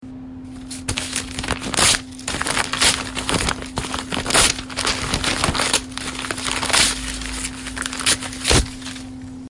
Paper ripping
sound of paper being crushed and ripped
crushing, ripping, paper